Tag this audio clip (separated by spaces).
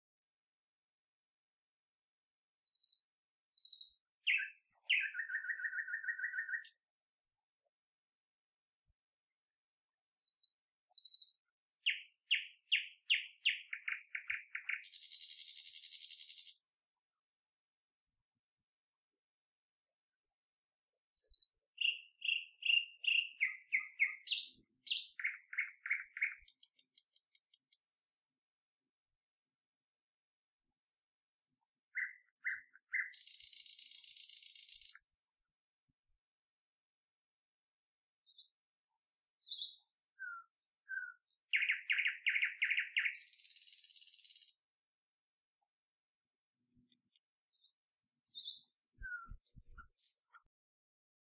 bird birds birdsong field-recording forest nightingale singing song summer thrush tweet tweeting woods